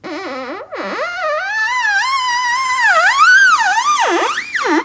sounds produced rubbing with my finger over a polished surface, my remind of a variety of things